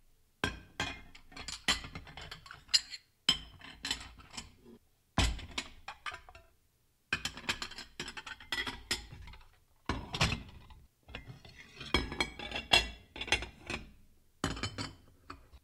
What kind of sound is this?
The sound of dishes and silverware clinking. Used to create a soundscape for a restaurant.